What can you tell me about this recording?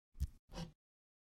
Picking up the hammer
The sound of a hand picking up a hammer, believe it or not.
concrete, dead-season, foley, hammer, handling